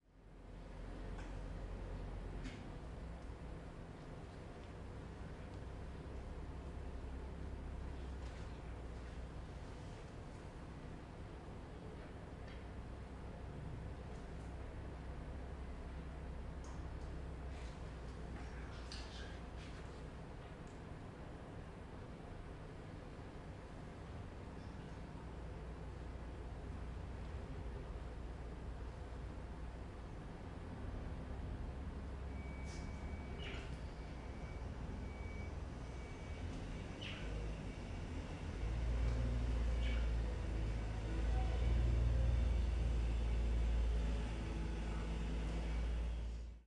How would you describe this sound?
Roomtone Bar 1
Roomtone inside a closed bar